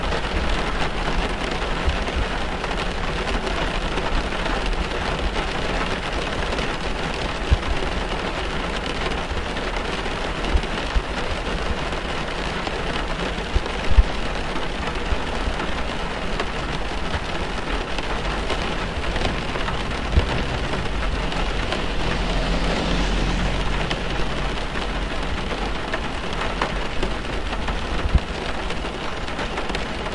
Inside a car in the rain (standing)
ambience, car, field-recording, inside, rain, weather